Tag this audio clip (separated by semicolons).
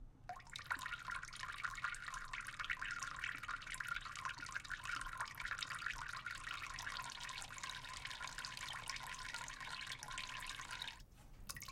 drip; peeing; toilet; water